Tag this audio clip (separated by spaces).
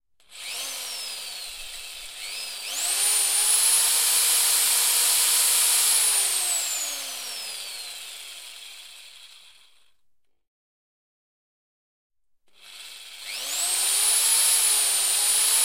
CZ Czech drill Pansk Panska